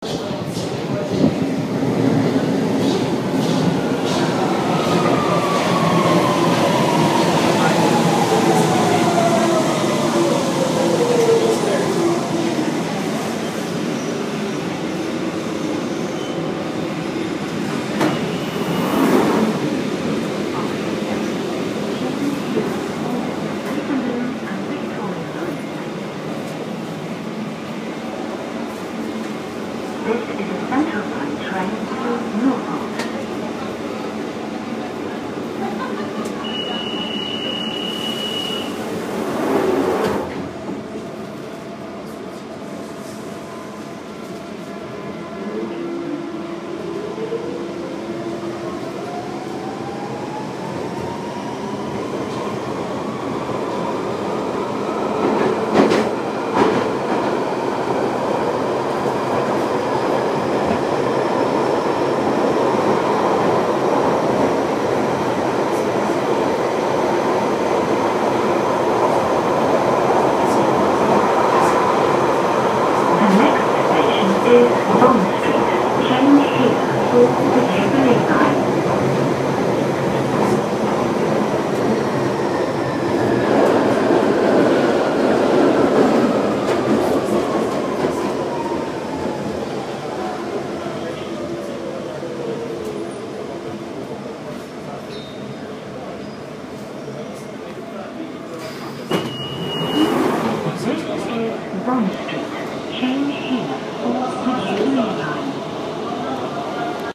Boarding Underground Train and short stop
A recent visit to London and I recorded these sounds with my iphone4 for a project, they aren't the highest of quality (limited by the mic quality on the phone) but they give a good indication and could probably benefit from some EQ to make it sound better.
national, train, rail, train-stop, footsteps, underground, boarding, announcement, london, station